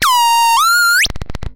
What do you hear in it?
Mute Synth HighPitch 007
A wobbling high pitch beep ending with clicking.
beep, click, clicking, high-pitch, Mute-Synth, wobbling